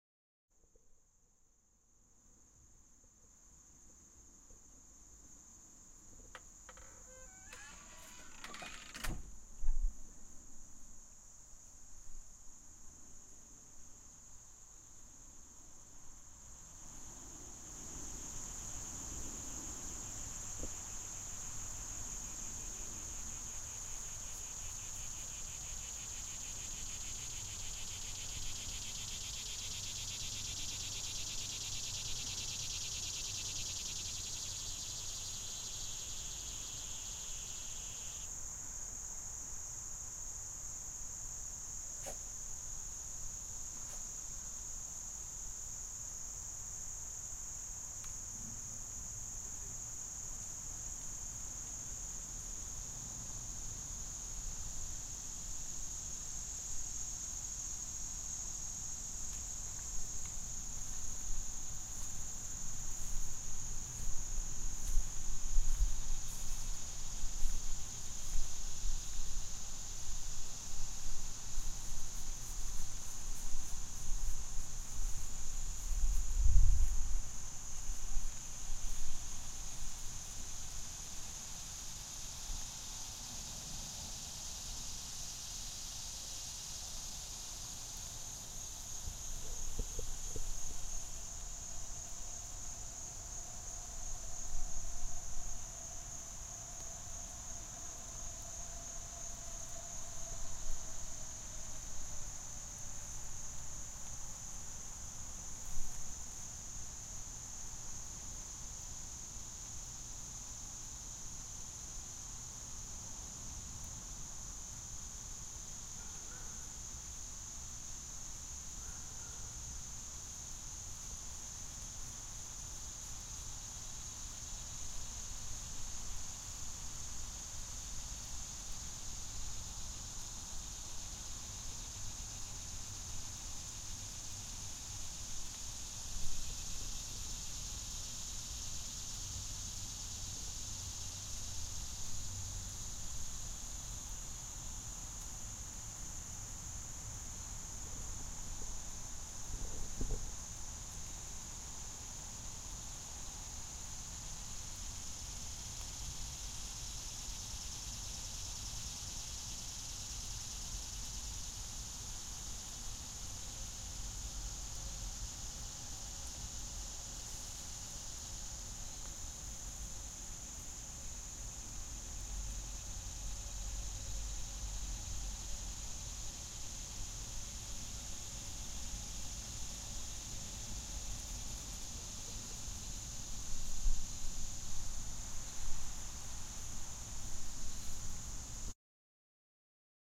Cicadas of Central New Jersey recorded with a Zoom H5.
cicadas, humid, insects, northeast